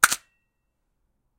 Metal Clang sound
Sound of a stamp being released from its locked position. Recorded in stereo with a TASCAM DR-100MKII.
clang, clank, metal, metallic, ting